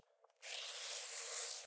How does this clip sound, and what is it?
celo depsegado
This sound is a celotape blasting off from a cardboard
celo, despegar, carton